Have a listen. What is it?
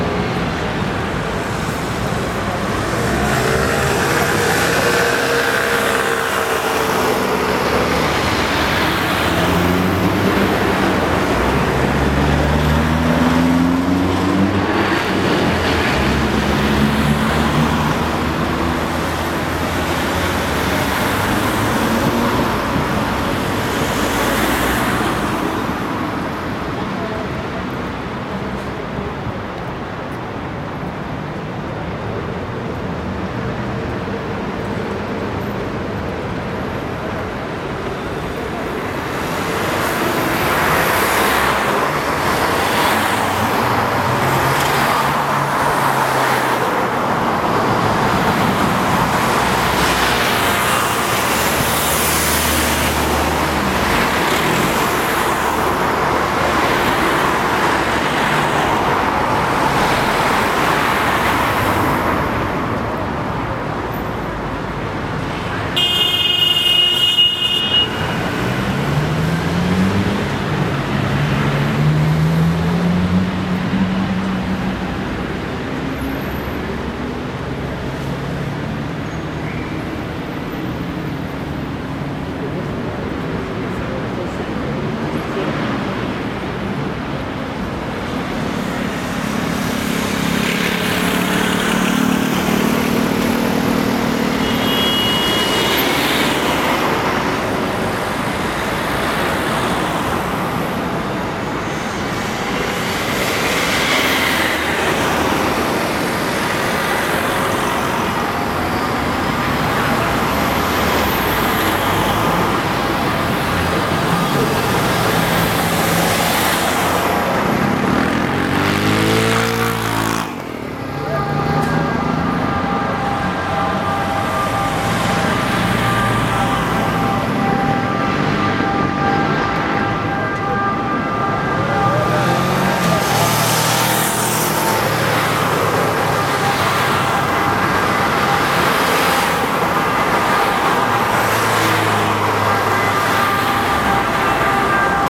City Milano traffic whistle moto
cars traffic city urban milano
cars, milano, urban